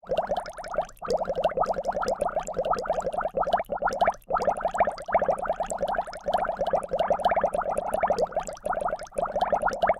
hg bubbling 2
Bubbles piped through HourGlass.
boiling, bubbles, bubbling, drip, dripping, drops, liquid, water